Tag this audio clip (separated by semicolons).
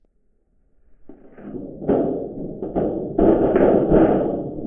foley; record; thunder